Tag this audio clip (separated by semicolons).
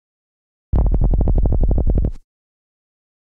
clicks; glitch; low-frequency; minimal; texture